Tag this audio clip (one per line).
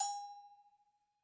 latin
bells
hit
percussion
cha-cha
samba